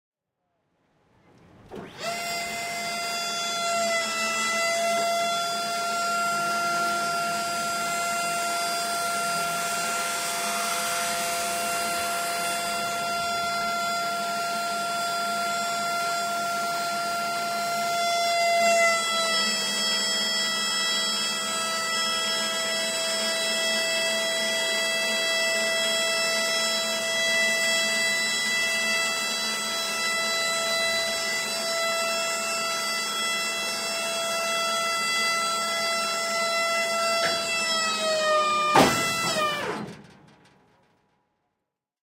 Nike Hercules missile being lowered from launch position. Recorded on August 2, 2008 at launch site SF-88L, one of the hundreds of US Army Nike missile batteries that formed rings around major US cities and other strategic locations from 1954-1974. Nike missiles, which could be equipped with high explosive or moderate-yield nuclear warheads, were intended as last resort defense against Soviet bomber attack.
Site SF-88L is in the Marin Headlands of California (near San Francisco) and has been preserved as a museum staffed by volunteer Nike veterans. The bunker elevator and launch platform have been restored to operating order, and visitors can watch as a missile (sans warhead, we're assured) is raised from underground and into its launch position in less than a minute.